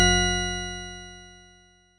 Generated KLSTRBAS 3(tri)
Generated with KLSTRBAS in Audacity.
computer, hit, impact, wave